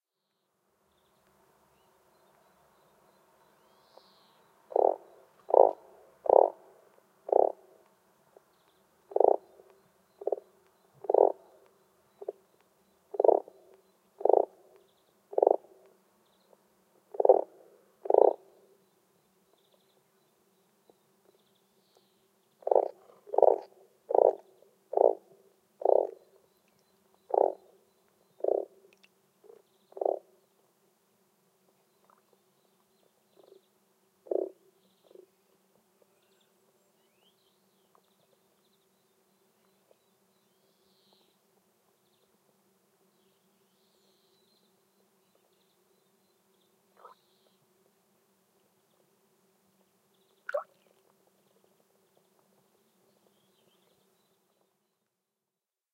Frog Croaking
A dual mono field-recording of a solitary frog (Rana temporaria) croaking at the beginning of the mating season. Rode NTG-2 > FEL battery pre-amp > Zoom H2 line in.
pond, croaking, rana-temporaria, field-recording, frog, plop, mono, croak